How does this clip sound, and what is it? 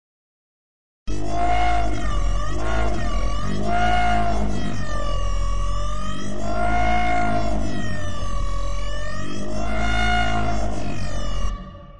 horror chainsaw synth
Created with a free vst instrument. This synthesizer sounds like a chainsaw or an engine. Appopriate for dark atmosphere pads.
chainsaw, effects, fx, horror, sound, synth